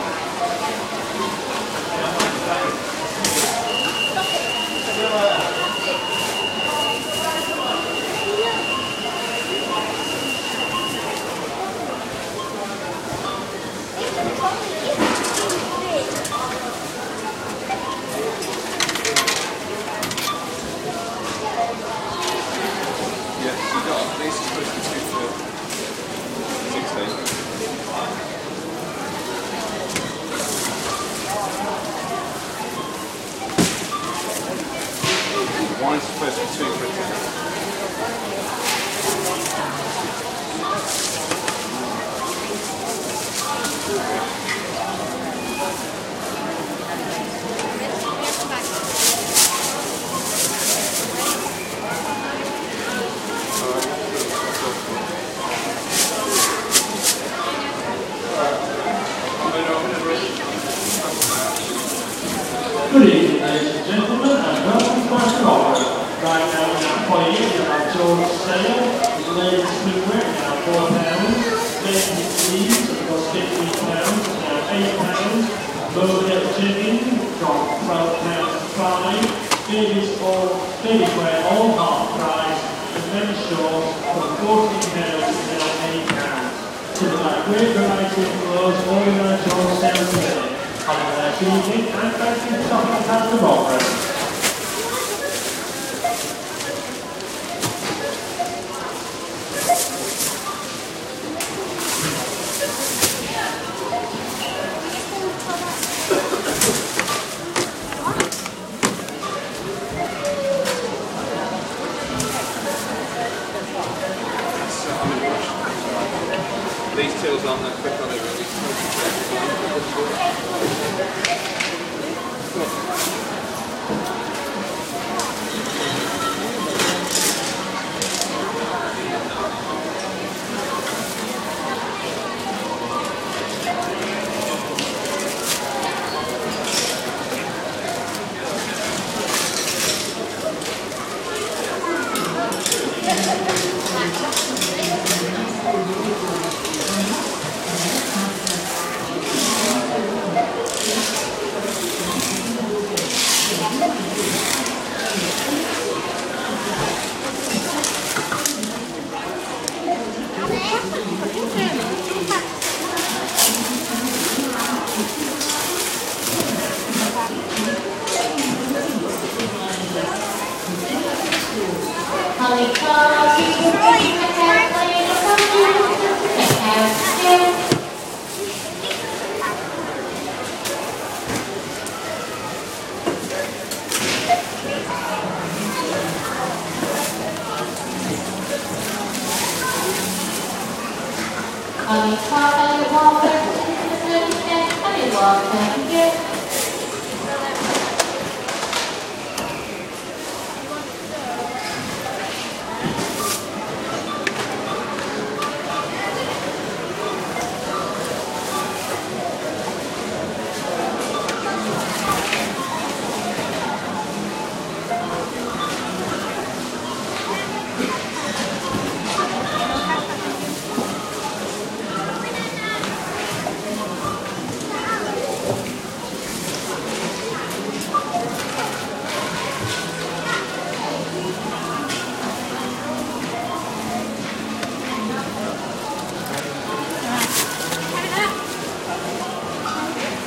Asda checkouts
Around the checkouts at my local Asda supermarket. The sound of people talking, items being scanned, bags being packed, security alarms, self-serve checkouts, several announcements and more.